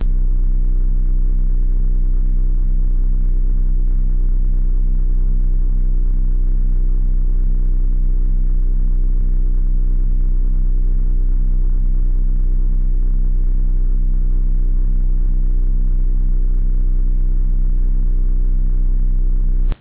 Deep, undulating hum used as light saber idle.Made using digitally generated/manipulated tone.
idle, light, lightsaber, loop, saber